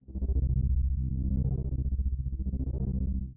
ufo-engine
Sounds used in the game "Unknown Invaders".